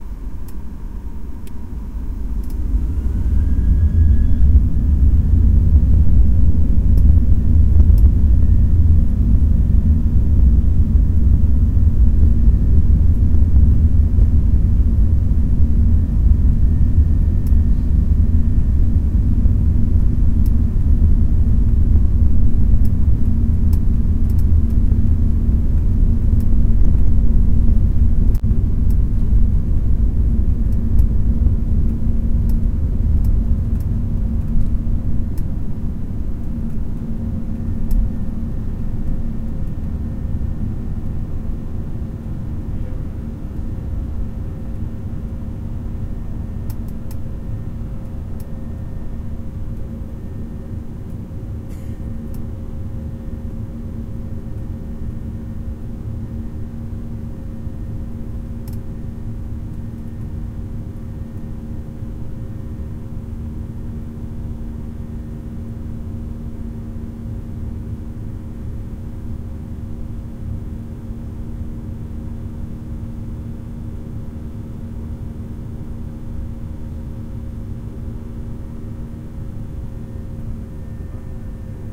Recording of Plane taking off. Apologies for clicking, something was loose on the plane.
Recorded with Tascam DR05